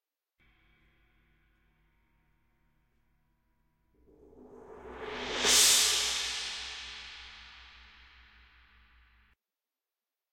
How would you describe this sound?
Cymbal Swell 1
Cymbal played with padded mallets.
Cymbal, Roll, Stereo, Sweep, Swell